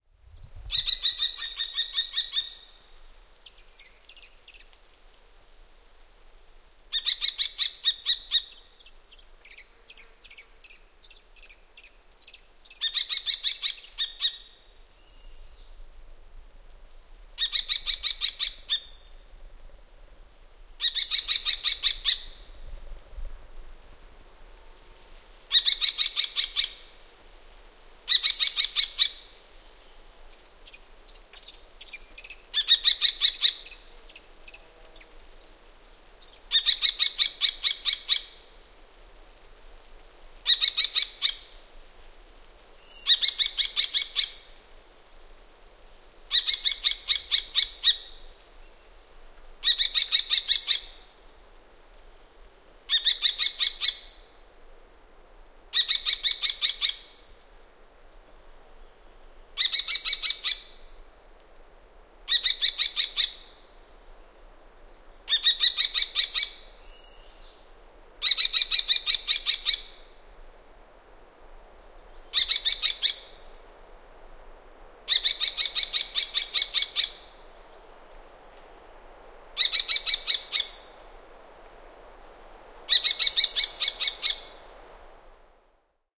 Tuulihaukka, varoitusääni / Kestrel, warning it's nestlings, trees humming faintly in the bg (Falco tinnunculus)
Tuulihaukka varoittaa poikasiaan. Taustalla vaimeaa puiden kohinaa. (Falco tinnunculus)
Paikka/Place: Suomi / Finland / Parikkala, Uukuniemi
Aika/Date: 08.07.1997
Birds, Linnut, Yle, Luonto, Suomi, Nature, Huuto, Kestrel, Warning, Tehosteet, Yleisradio, Bird, Tuulihaukka, Haukka, Soundfx, Falcon, Haukat, Finland, Finnish-Broadcasting-Company, Call, Animals, Varoitus